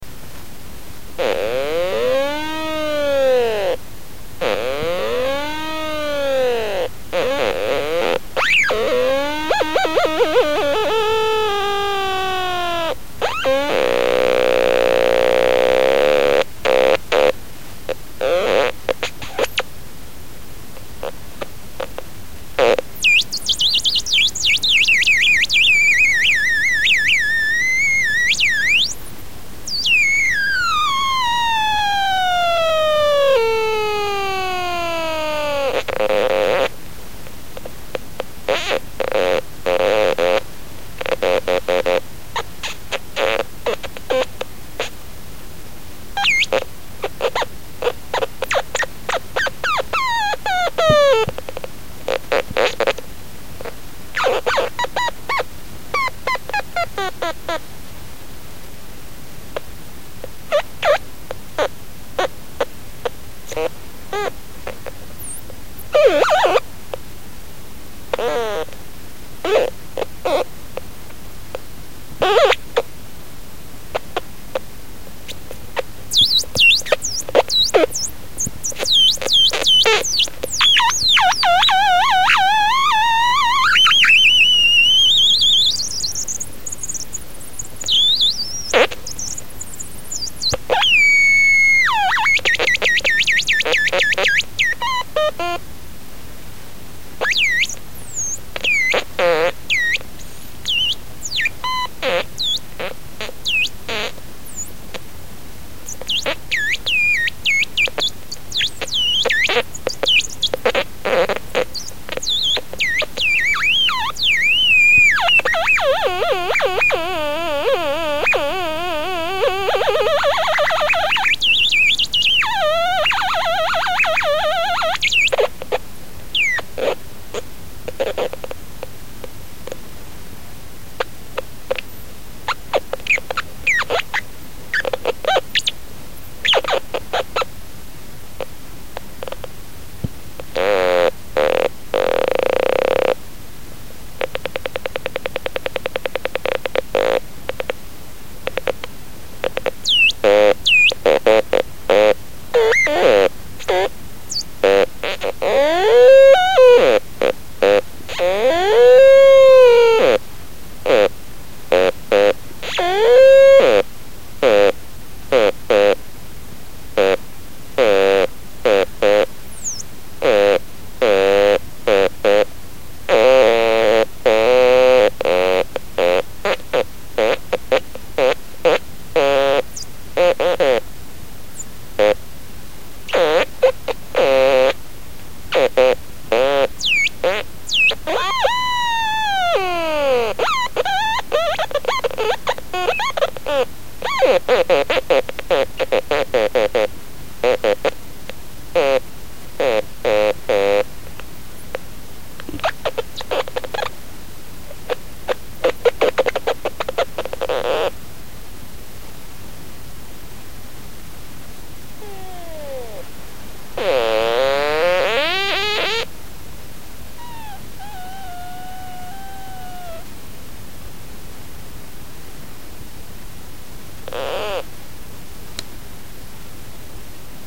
Me playing on my crackle-box I made myself. Recorded on a cheap mic. 3.47 min of nice Samples. You need to cut by yourself.